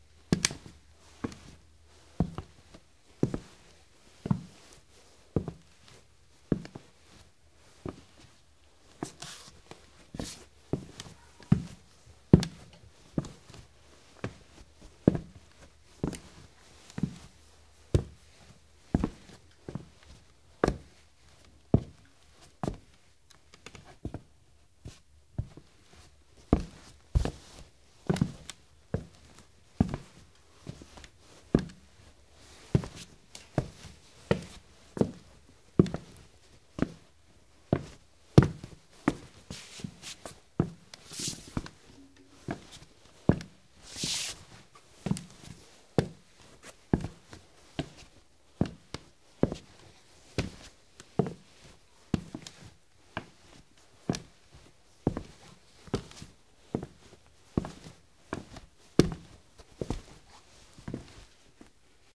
Footsteps Cowboy Boots Hardwood Floor
Cowboy boots walking on hardwood floor.
foley, footsteps, fx, sfx, sound, soundeffects, soundfx, studio